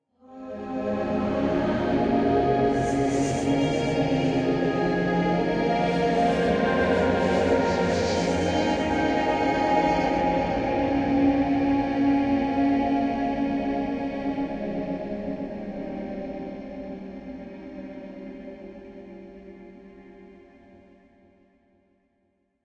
A mixture of choirs and synthesised sounds - one of many I have made for use as intros/backgrounds to give an unearthly feel. Part of my Atmospheres and Soundscapes pack which consists of sounds designed for use in music projects or as backgrounds intros and soundscapes for film and games.